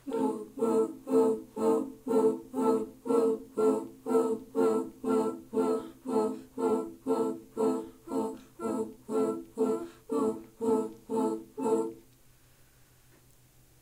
These are recordings of a small female choir group I recorded for a college film back in 2012. I uploaded the cleanest takes I got, room noise is there but isn't terrible.